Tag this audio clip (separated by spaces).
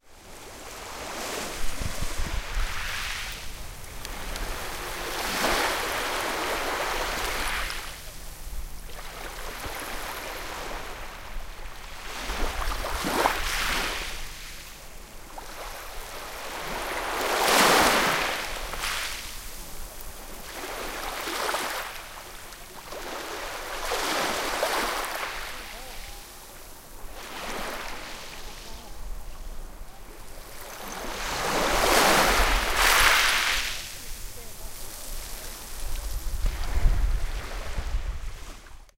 Coral field-recording fitzroy-island great-barrier-reef Queensland waves